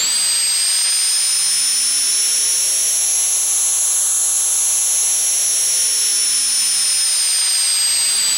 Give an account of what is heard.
A Casio CZ-101, abused to produce interesting sounding sounds and noises